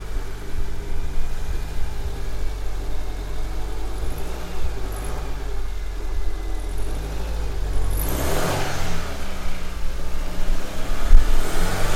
Car motor engine
vehicle, acceleration, race